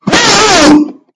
Jack Scream #2
This is a very good quality scream! You can use this in a game if you want. :D
I created it with audacity.
Cool, GoodQuality, JackDalton, Scream